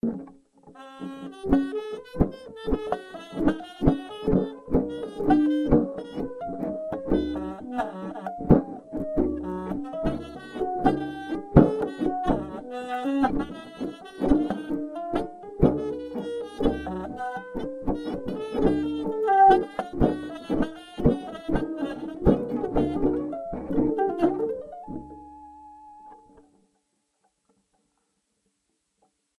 solo study 2c
Recording of prepared guitar solo, pretty lo-fi.
lo-fi, guitar, prepared-guitar